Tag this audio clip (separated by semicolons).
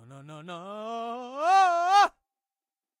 666moviescreams; horror; scary; scream; screaming